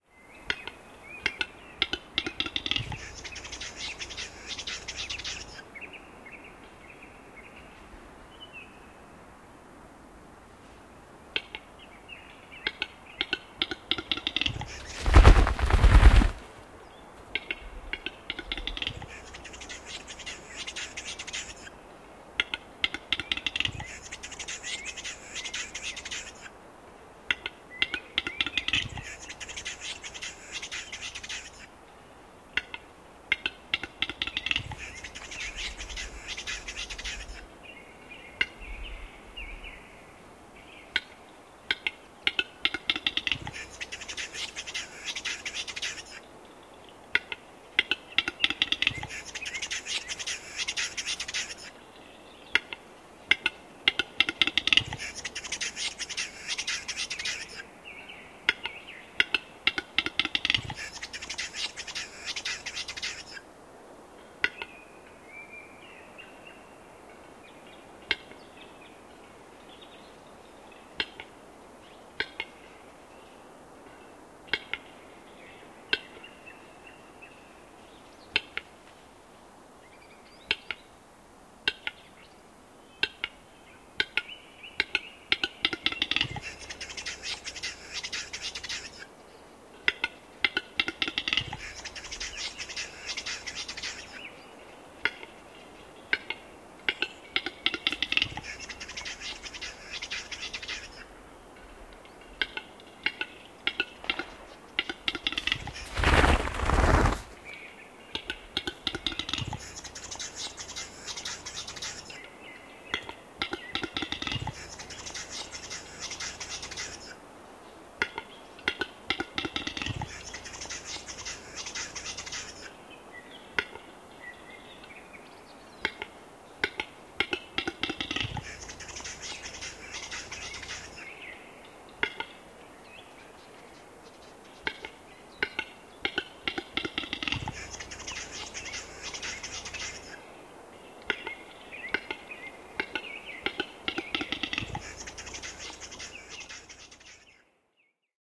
Metso, soidin, kevät / A capercaillie having a display in the spring, making sounds, flapping wings, some birds faintly in the bg
Metso soitimella, tyypillisiä soidinääniä, siipien lyöntiä. Taustalla vaimeasti rastas ja tuulen huminaa.
Paikka/Place: Suomi / Finland / Enontekiö
Aika/Date: 11.04.1994
Soidin, Tehosteet, Lintu, Field-Recording, Nature, Capercaillie, Finland, Bird, Yleisradio, Capercailzie, Spring, Metso, Display, Yle, Linnut, Finnish-Broadcasting-Company, Soundfx, Suomi, Luonto, Birds